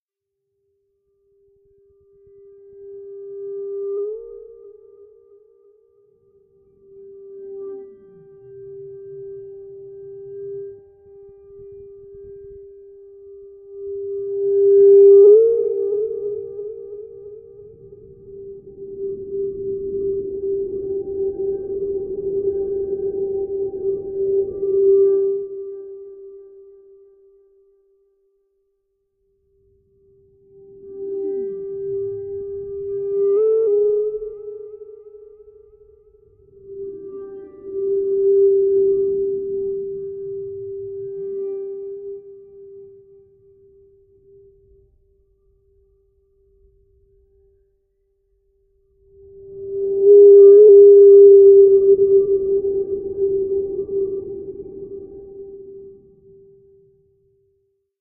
signalsounds for dark scary sound design
ambiance, signals, sound-design, synth